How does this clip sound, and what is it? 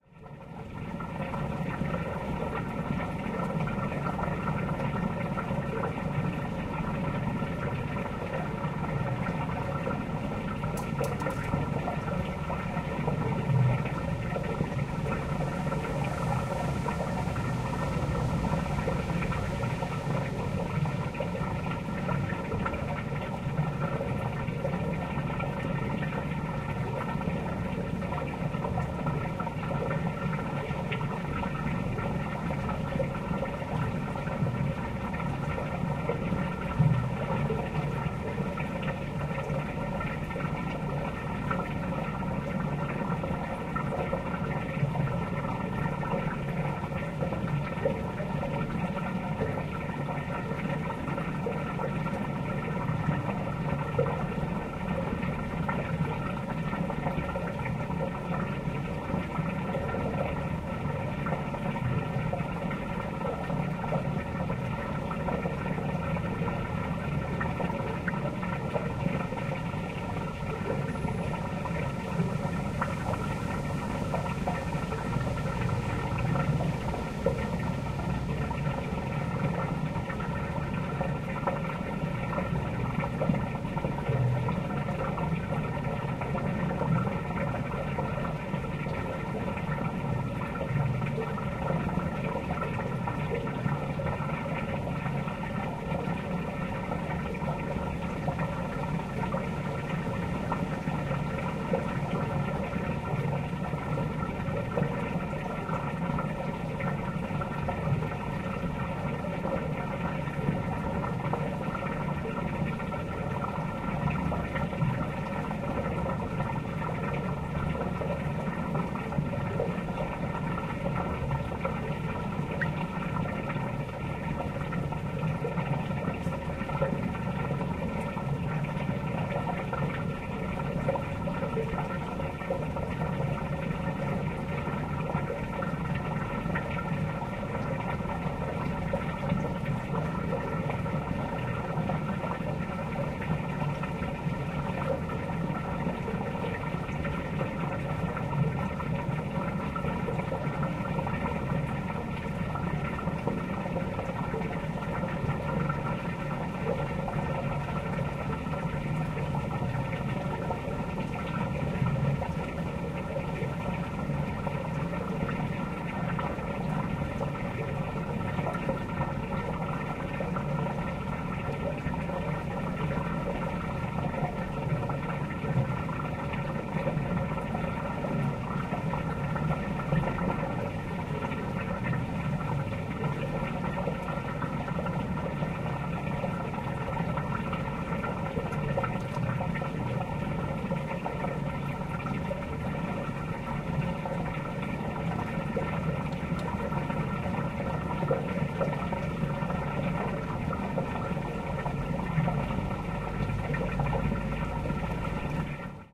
water pump sewer water stream
Water pump goes to a sewage system that is audible moving a lot of water.
sewer, stream, water, waterpump